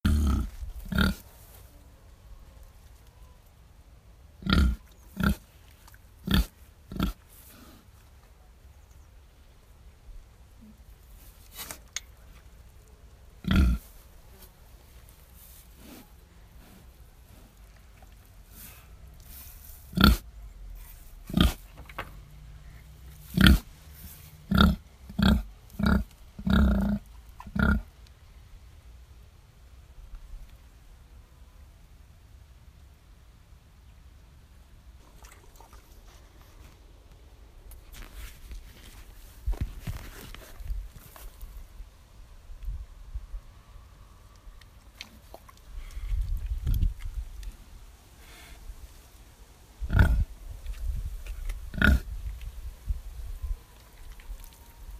Real sounds of pigs
animal, farm, pig, pigs